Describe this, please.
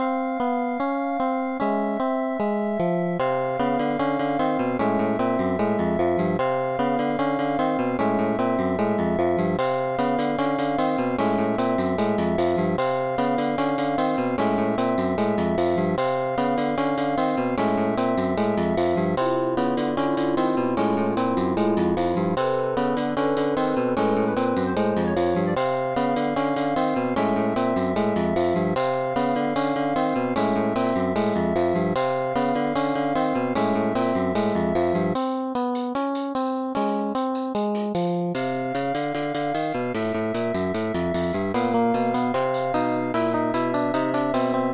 suspenseful music
It is just suspenseful music
Sus